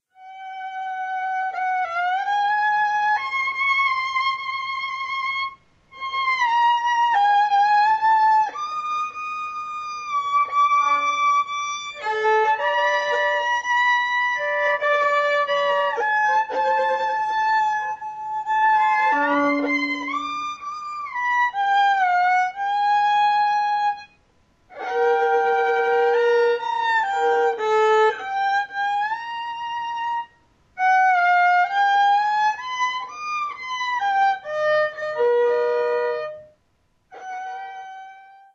Voli playing 1
Small clip used in a play, where viol was played off stage